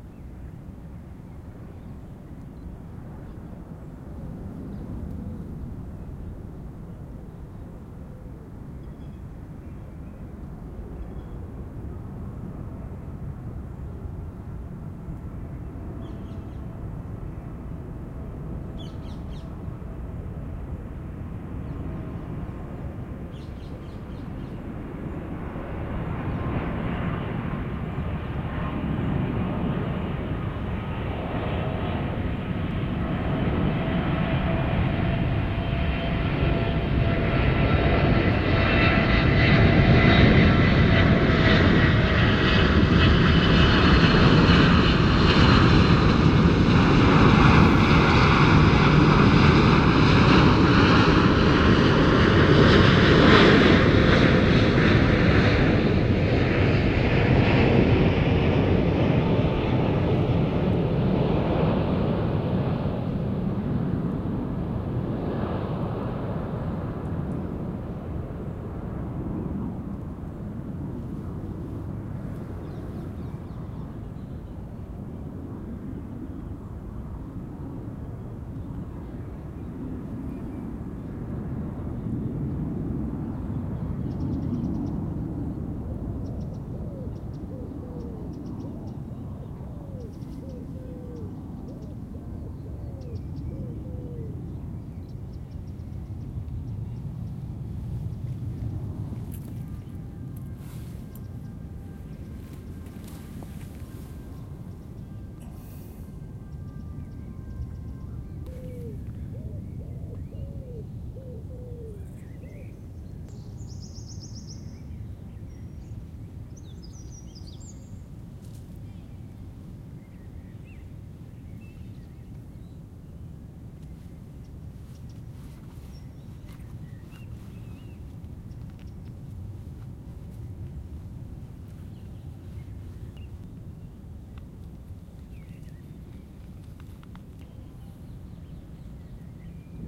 Boeing 777 200 plane flying by at 300 m
Landing Boeing 772 airplane flying by at 300 m altitude, observed about 300 m to the side of the flight path (upward looking angle about 45 deg at closest point).
Recorded with Zoom H2N voicerecorder, normal stereo mode, no compression.
Some birds in the environment (natural birds).
If you want it to fly the other direction just reverse the stereo.
777, aircraft, 200, Boeing, airplane, jet, plane, flyby, B772, landing